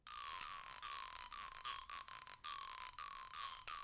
lasers (foley) 1-2
Laser shooting (Foley)
laser, shooting